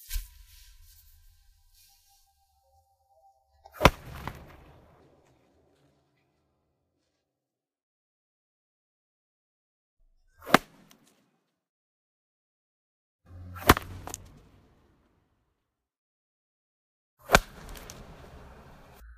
My son James using the stripped root of an Ivy plant to bash the ground. It sounded to us a bit like a film punch. Dry. Just recorded on a phone I'm afraid.
Made by James and Malcolm Galloway.